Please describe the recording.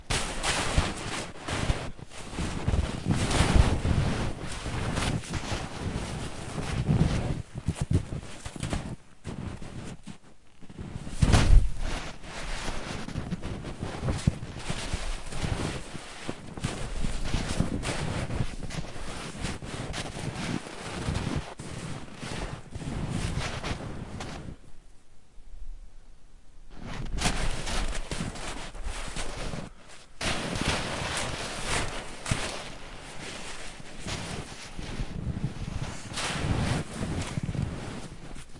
brottas i snö 2
Wrestling in snow. Recorded with Zoom H4.
snow, wrestling